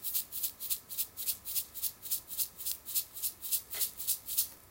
sugar bag01
Small paper sugar bag being shaken. Recording hardware: Apple laptop microphone